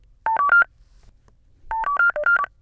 ups scanner
Genuine UPS package scanner. I recorded this a few years ago direct to my desktop. UPS guy was a good sport and let me grab a few samples. (I use this as part of button pushing and computer cues).